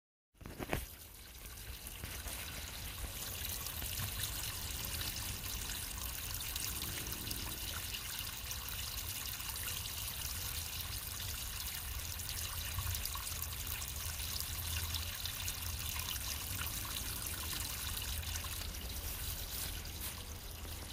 Galaxy S10e
Tiny 5 inch waterfall in SE PA
littleWaterfall1 = close 2 inches away
littleWaterfall2 = far 4 ft away
littleWaterfall3 = medium between those
Hopefully the sounds of my feet are inaudible or easily removed was kind of precarious footing.